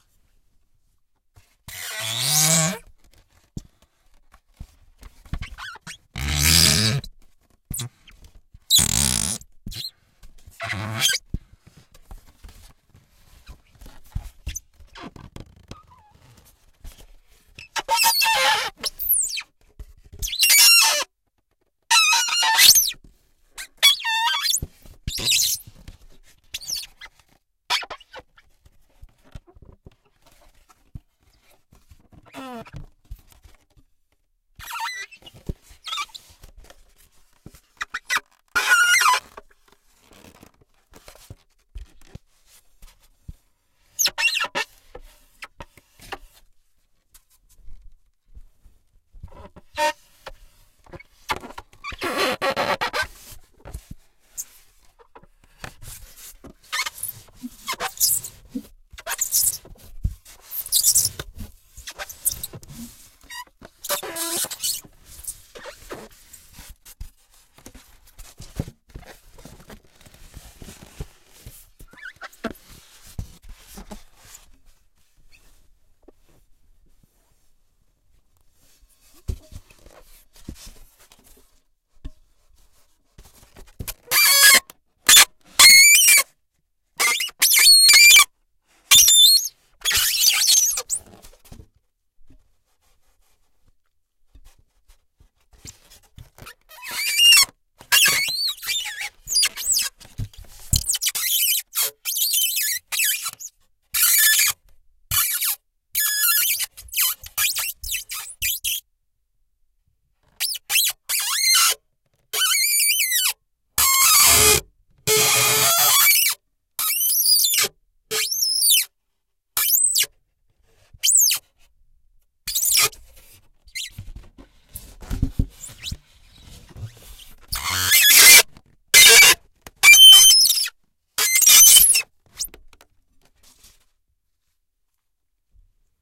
Shivering Sound 08 - Polystyrene on glass window
Really unpleasant noises in this pack.
They were made for a study about sounds that creates a shiver.
Not a "psychological" but a physical one.
Interior - Stereo recording.
Tascam DAT DA-P1 recorder + AKG SE300B microphones - CK91 capsules (cardioid)
glass, polystyrene, shiver, unpleasant, window